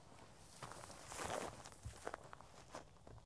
Walking in crunchy dry grass to simulate running in grassy field.